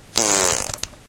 fart poot gas flatulence